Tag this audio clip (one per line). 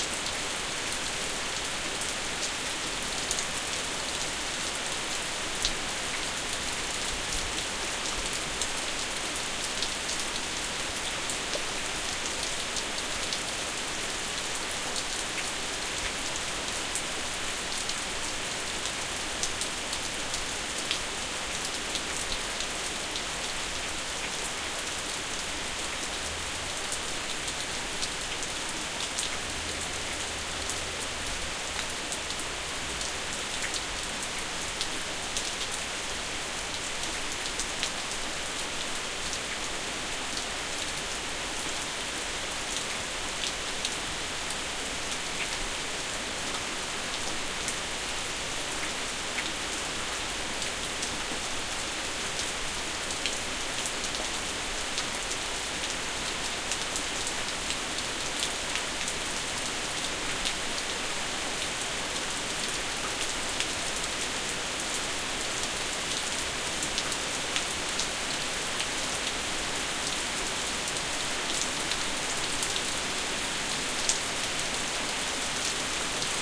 Nature
Loop
Rain